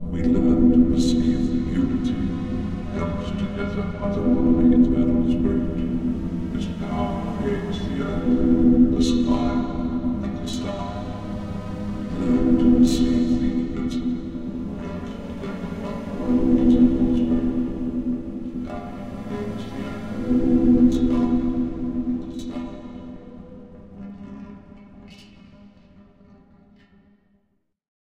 sea of unity f
An increasingly distorted voice over an orchestral background. Part of my Strange and Sci-fi pack which aims to provide sounds for use as backgrounds to music, film, animation, or even games.